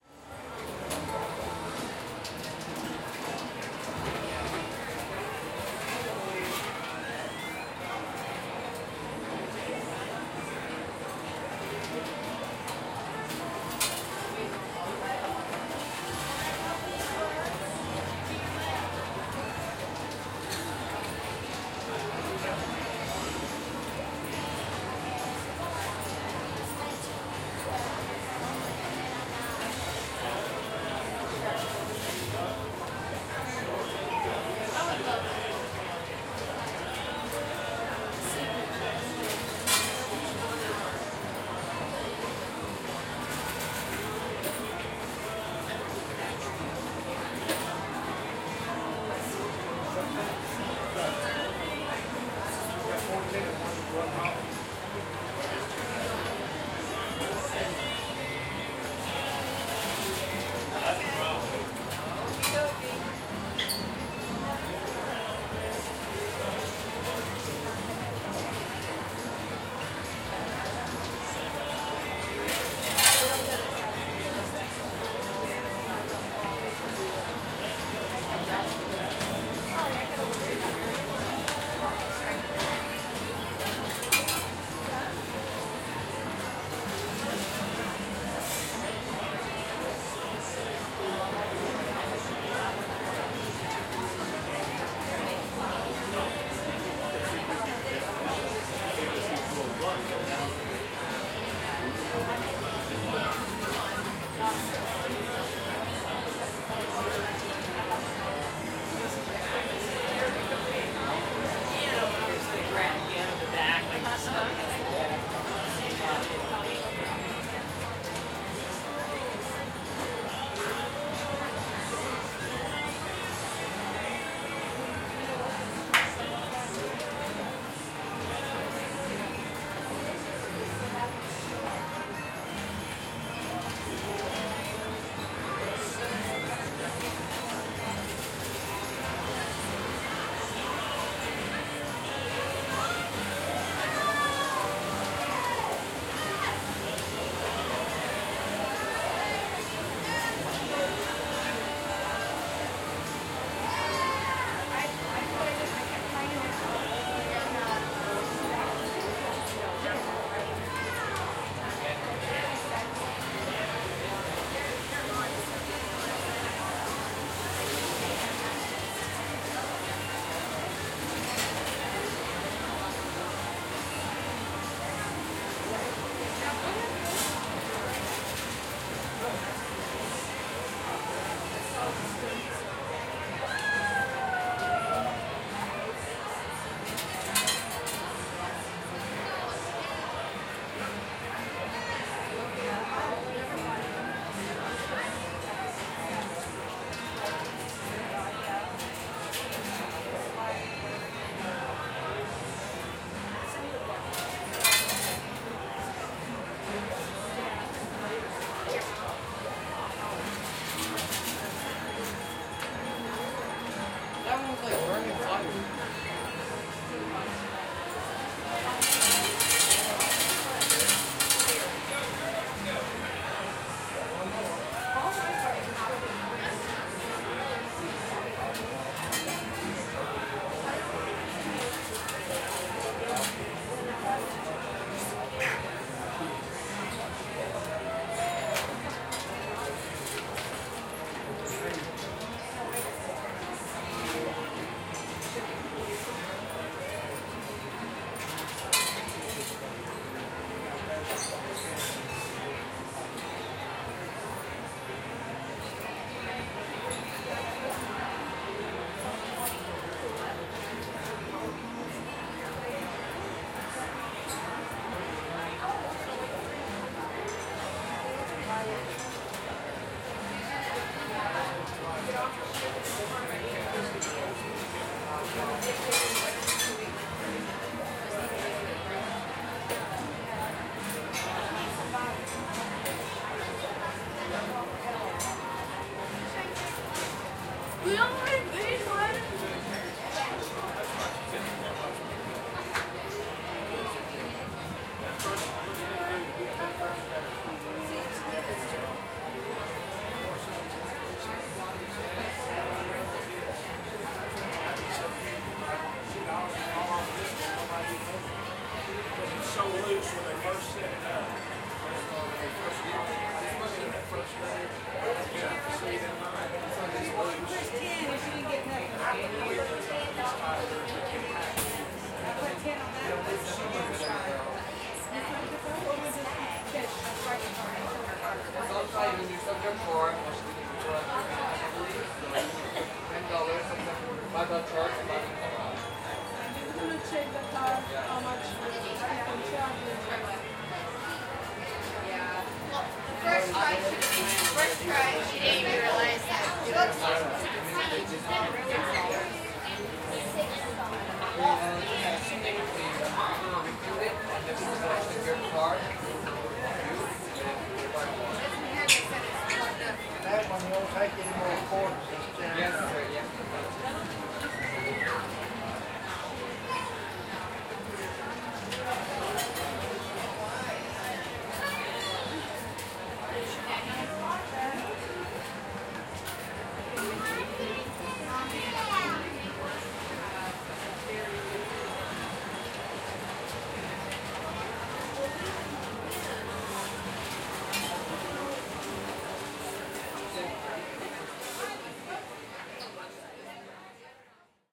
Casino Ambiance
A short little bit of ambiance I recorded with my Tascam DR-40 while on a cruise ship. Enjoy:)